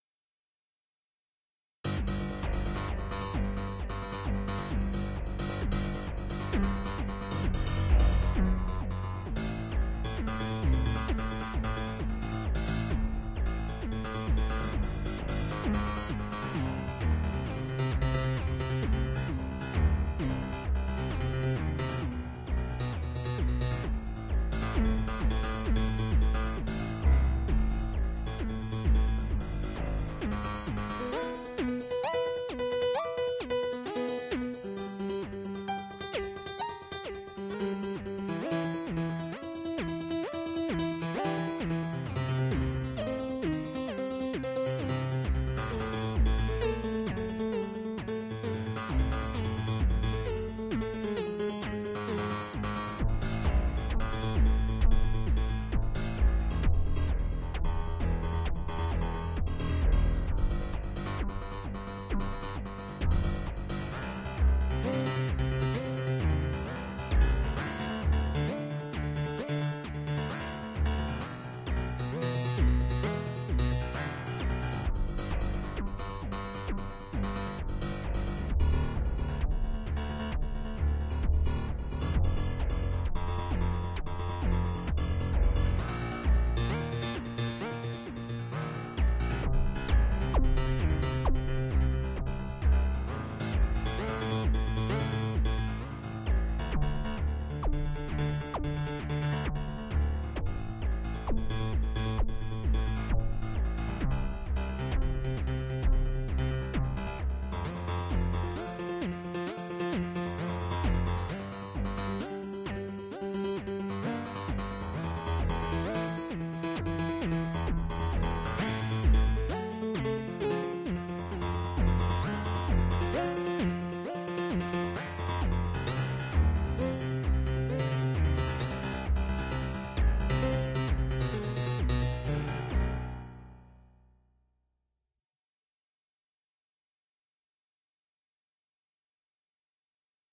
Piano with The Bends
This is a melody i wrote in piano-roll, underneath .
looking for variations i sent the score to FLP Studio's RIFF Machine and
this is a version it spit-out. lol,, its so weird and strange.... GOOD!!
bend, concert-grand, drama, melody, piano